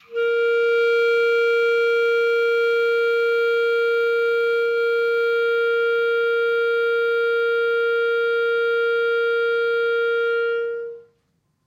One-shot from Versilian Studios Chamber Orchestra 2: Community Edition sampling project.
Instrument family: Woodwinds
Instrument: Clarinet
Articulation: long sustain
Note: A#4
Midi note: 70
Midi velocity (center): 42063
Room type: Large Auditorium
Microphone: 2x Rode NT1-A spaced pair, 1 Royer R-101 close, 2x SDC's XY Far
Performer: Dean Coutsouridis